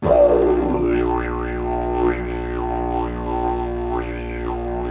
Recorded approx 5 seconds of simple tone on 5-ft didgeridoo